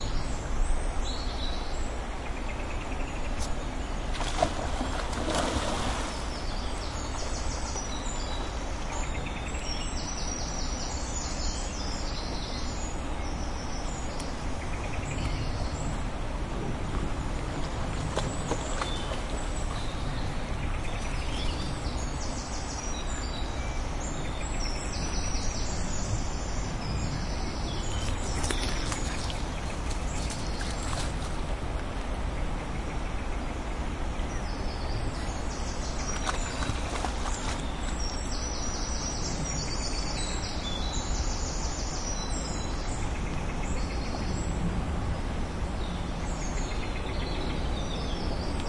170527 0033 dawsholm park with jess
Took a walk with my dog Jess at Dawsholm Park in Glasgow. She is a Sprocker Spaniel puppy and at time of recording she was just under 1 year old. She loves an adventure and to rummage about in the bushes. Most of these recordings are of the parks ambience and wildlife with birds tweeting and the like but you will hear her rummaging about on occasions and zooming past the mic. All recordings made with a Tascam DR-05 at various locations throughout the park
Spaniel, Park, Pupster, River, Field-Recording, Stereo, Sprocker, DR-05, Dawsholm-Park, Dog, Glasgow, Tascam, Tascam-dr-05, Birds, Wildlife, Dogwalk